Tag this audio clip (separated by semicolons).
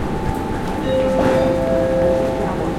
announcement-sound; automated-sounds; field-recording; rail; railway; train; trains